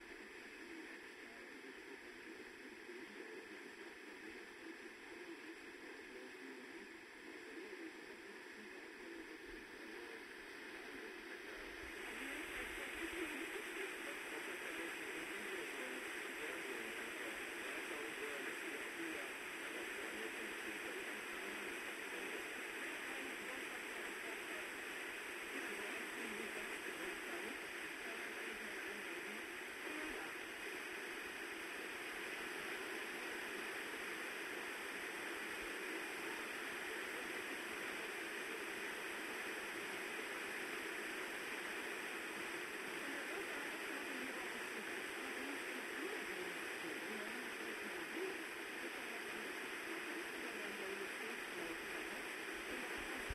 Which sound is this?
The sound of my Radio noisy. Recorded within the shooting for my Film
Sound recorded with the ZOOM H1.